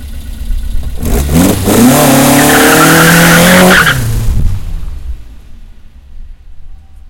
The car being pulled away very aggressively.
Aggressive, Lotus, Sports-Car, Tire-spin